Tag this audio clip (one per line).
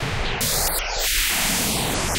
synth
synthesis
digital